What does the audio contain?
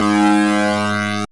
180 Krunchy Osc Synth 01
bertilled massive synths
180, bertill, dub, free, massive, synth